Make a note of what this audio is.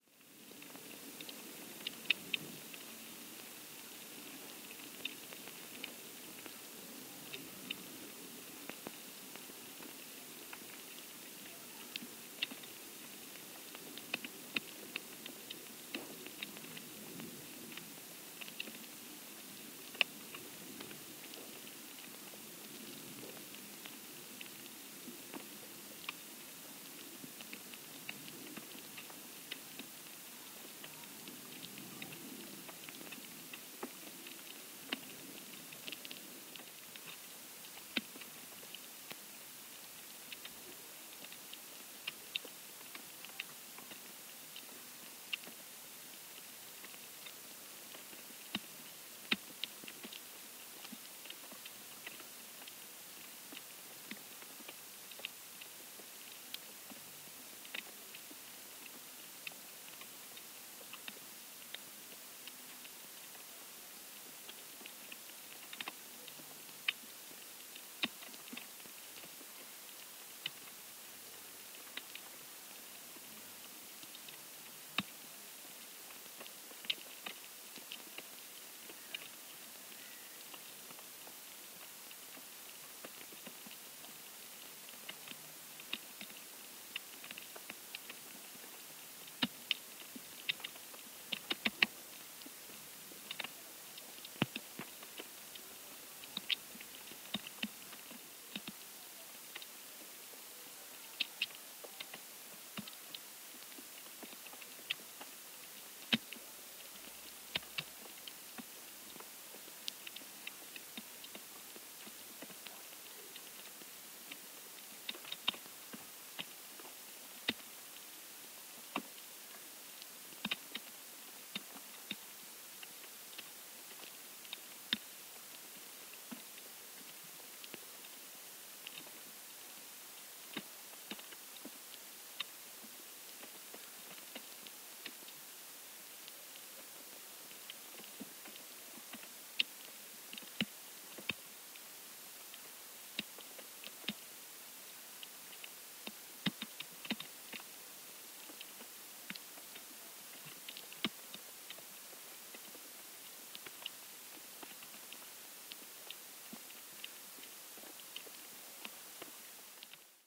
Falling Snow Recorded with a Hydrophone
Falling snow recorded with an Aquarian Audio H2a Hydrophone that was covered by snow that had already fallen and settled. Snow continued to fall and can be heard hitting the location of the hydrophone.
Recorded in the UK on the evening of 11th December, 2022.
The hydrophone was attached to a Zoom F6.
snow, falling-snow, winter, weather, cold